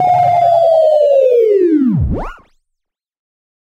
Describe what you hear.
Attack Zound-11
Similar to "Attack Zound-01" but with a long decay and a strange sound effect at the end of the decay. This sound was created using the Waldorf Attack VSTi within Cubase SX.
electronic, soundeffect